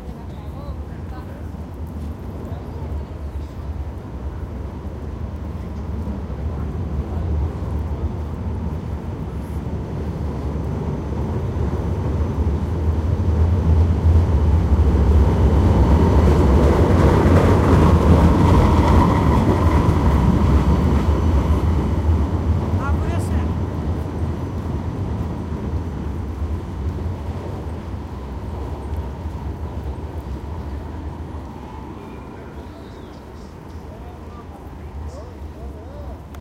tram rolling near Archivo de Indias, downtown Seville. Recorded with Edirol R09, internal mics
city, tramway, tramcar, rumbling, machine, field-recording